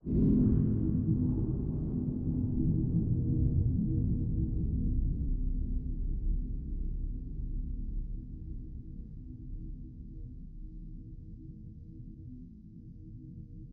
Viral Blue Thunder
Treated Piano Chord
aquatic, atmospheric, piano